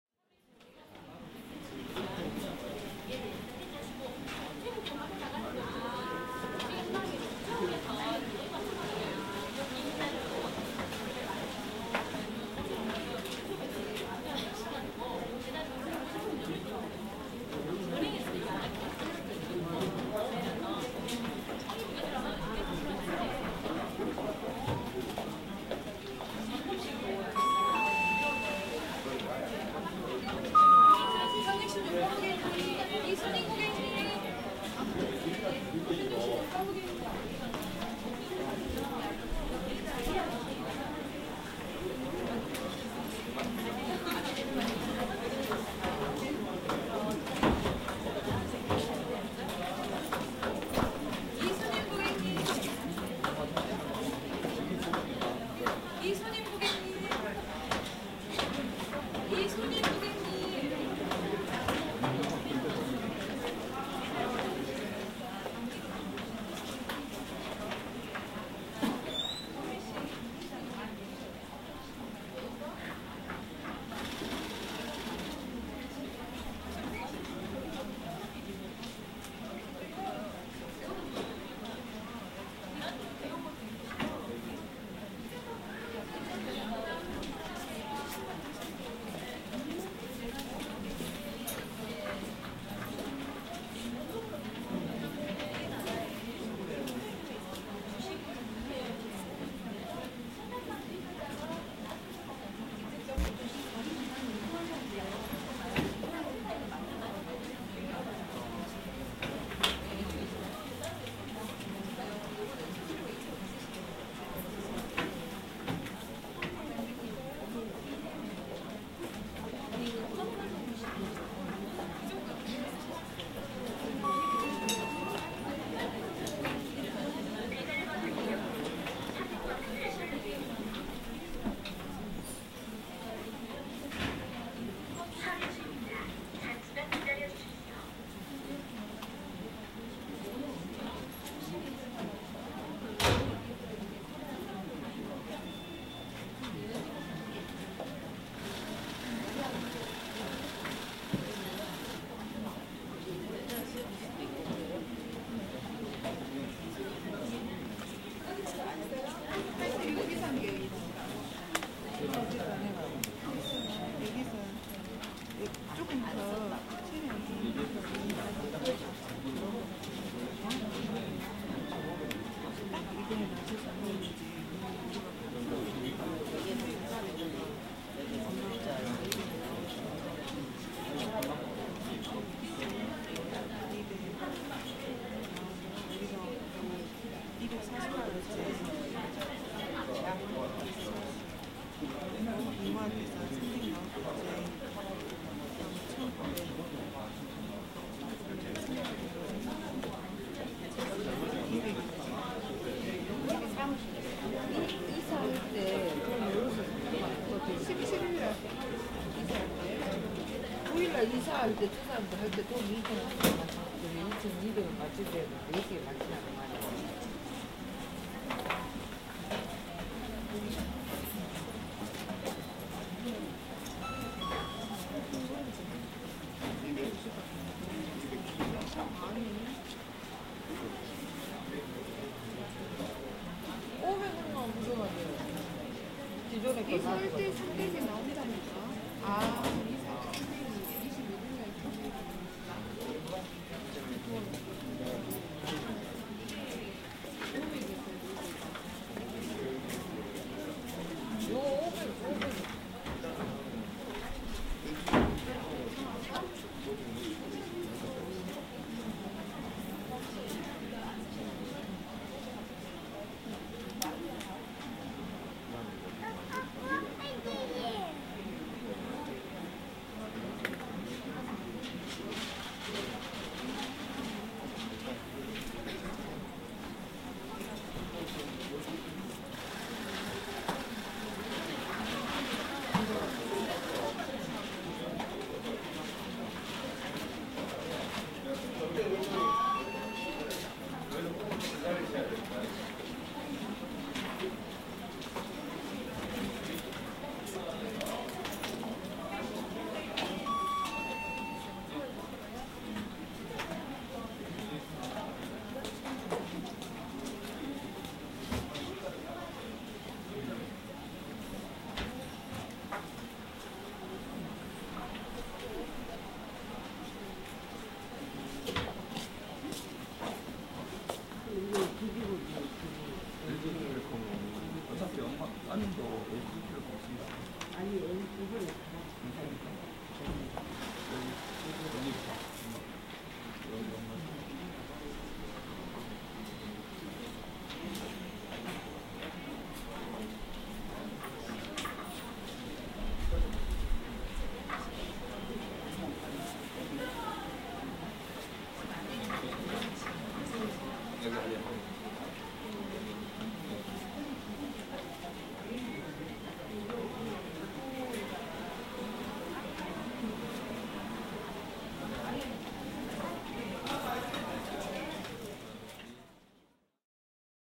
Ambience Bank Kookmin Bank
Ambiance recorded in a small scale bank in Mokpo, South Korea. You can hear a fair amount of Korean chatter, though I tried to avoid too much of that, and you can hear some standard bank noises. Raw, no processing.
ambience, bank, field-recording, korean